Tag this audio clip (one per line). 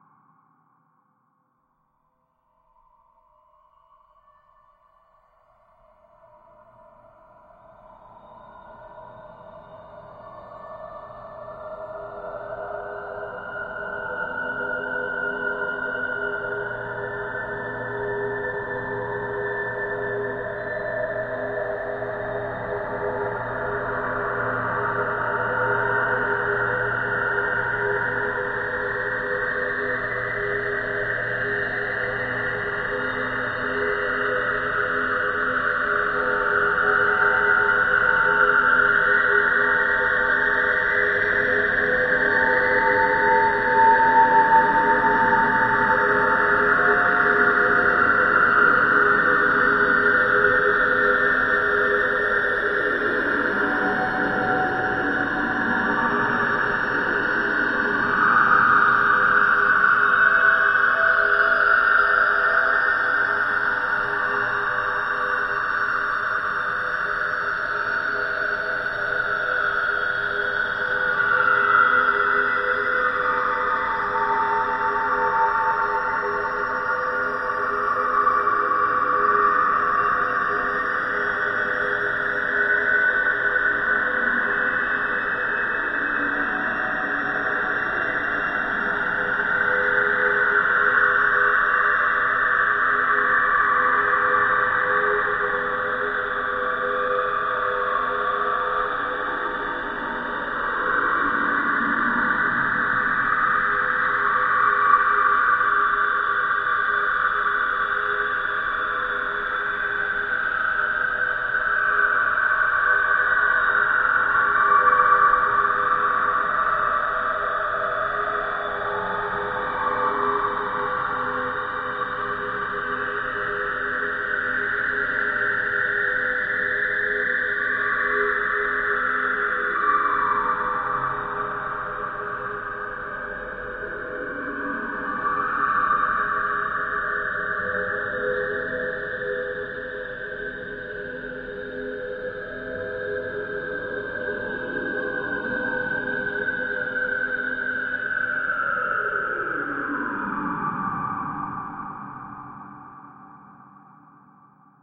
ambient
multisample
horror
freaky
soundscape
drone
pad
evolving
artificial